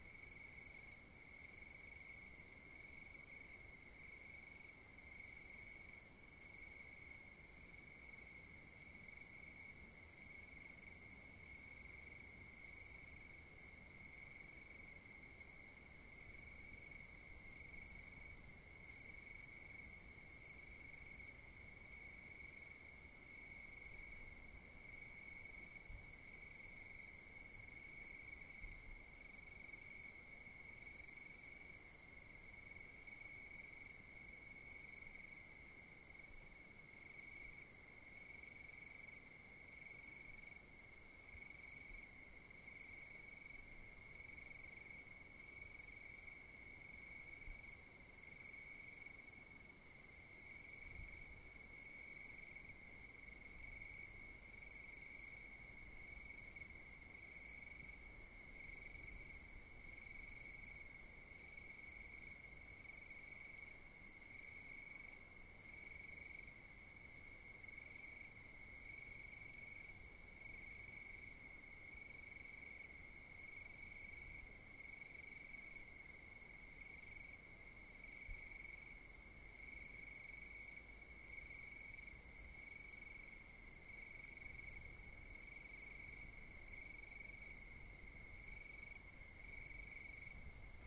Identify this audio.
Countryside at the night crickets
Larzac plateau. The night , very calm , some distant crickets
recorder sonosax SXR 4
mic: Stereo orth schoeps
countryside, crickets, field-recording, insects, nature, night